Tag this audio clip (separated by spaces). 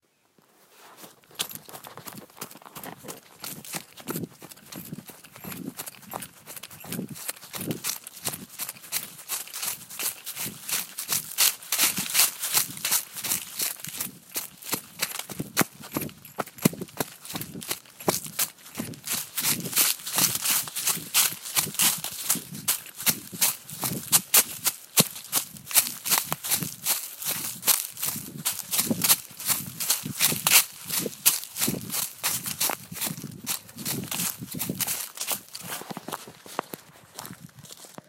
woods running leaves